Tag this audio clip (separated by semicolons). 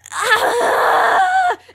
exasperation; frustration; groan